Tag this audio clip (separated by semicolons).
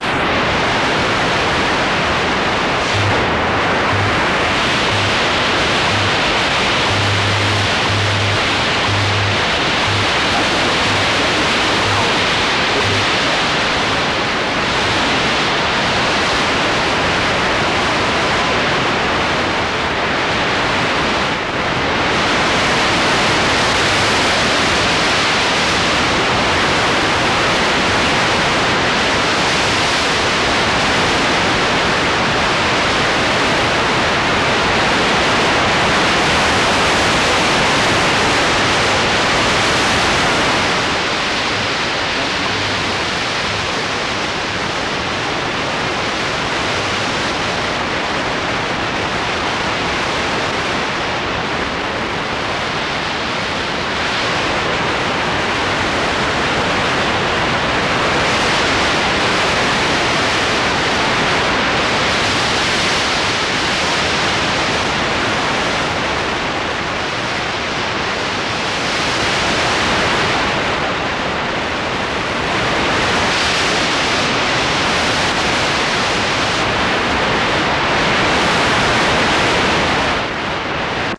Radio-Static; Static